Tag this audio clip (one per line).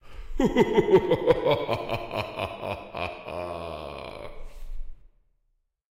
evil
halloween
laugh
spooky